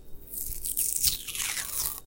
sticker peel
Peeling a sticker off the bottom of a newly purchased drinking glass.
Recorded with Rode NTG2 mic into Zoom H4.
peel, glass, sticker